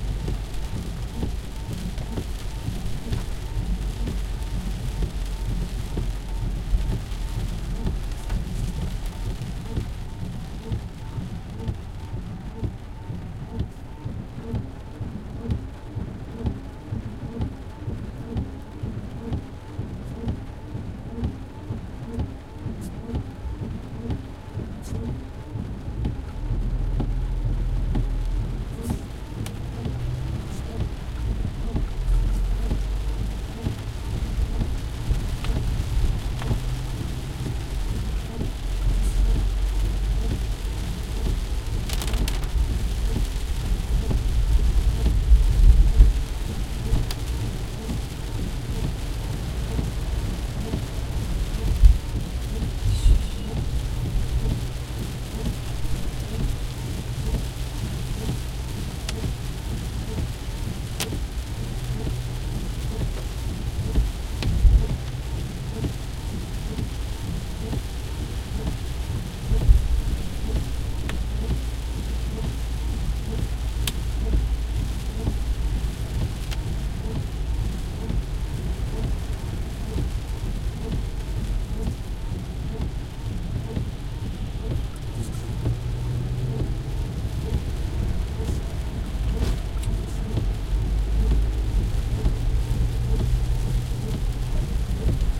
Heavy Rain in the car
Heavy rain recorded from the car with Zoom H4n. Rhytmical sound of windshield wipers and water running on the windshield.
car, field-recording, rain, storm, weather, windshield, wiper, wipers